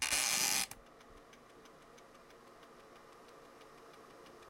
wind up bathroom fan timer
wind, up, fan, timer, bathroom, ticking